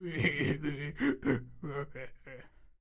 weird laughter 4
laugh, laughter